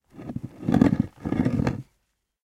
Rock Scrape
A stereo field recording of a granite rock being slid along granite bedrock. Rode NT-4 > FEL battery pre-amp > Zoom H2 line-in.
bedrock, field-recording, rock, scrape, stereo, stone